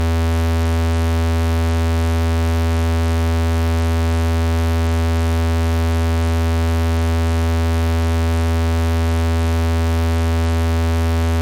Sample of the Doepfer A-110-1 rectangle output.
Pulse width is set to around 50%, so it should roughly be a square wave.
Captured using a RME Babyface and Cubase.